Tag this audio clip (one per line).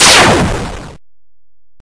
8bit
gunshot
videogame